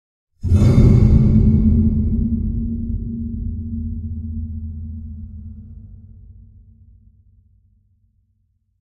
whoosh dark
sfx for animation
movement, dark, whoosh, heavy